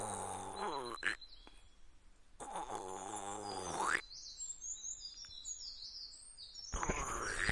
Hiroshima MA TE01 Forest
Forest, Hiroshima, Texture